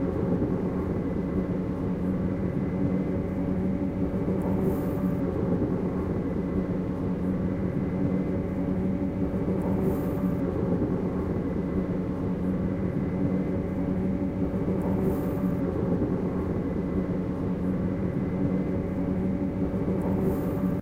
Train full speed 01
The train type is Škoda InterPanter. This track is recorded between station Podivín and Břeclav.
electric-train, locomotive, loop, rail-road, rail-way, train